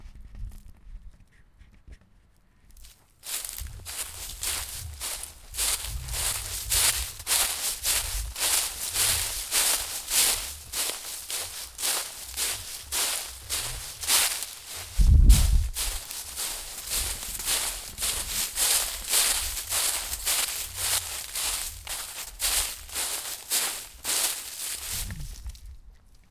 The sound of someone walking through Autumn leaves scattered on the ground.
Autumn, Dry-Leaves, Fall, Footsteps, Leaves